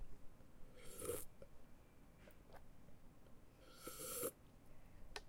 A couple short sips of a drink.